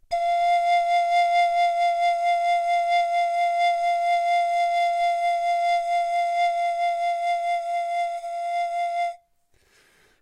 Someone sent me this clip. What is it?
long tone vibrato pan pipe F2
pan, pipe, f2